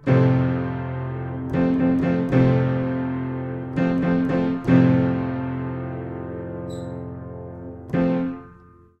Playing hard on the lower registers of an upright piano. Mics were about two feet away. Variations.